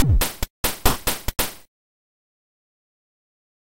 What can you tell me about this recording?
8bit drumloop, 140bpm
Short drum loop made on caustic 3 with 8bit sounds
140bpm, bitstep, caistic-3, edm